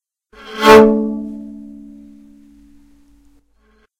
The jouhikko is a traditional, 2 or 3 stringed bowed lyre, from Finland and Karelia.